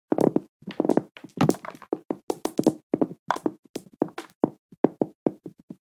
Gun-Shells on Carpet 2
Didn't see any clips for bullet shell/casings falling to a carpeted floor, so I made some. Done using 22. shells, a cheap mic and Sound Forge. This is one clip with several shell/casings hitting the carpet. Ideal for fully automatic gun.
Gun, casing, casing-on-carpet, shell